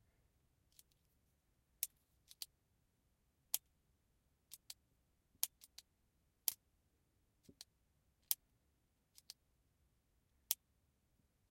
Pen Clicking 02
Clicking a pen
click, clicking, clicks, ink, OWI, pen, pops